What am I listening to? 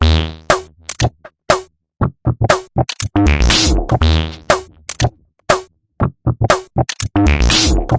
Massive Loop -48
An experimental electro loop with a minimal and melodic touch created with Massive within Reaktor from Native Instruments. Mastered with several plugins within Wavelab.
drumloop, loop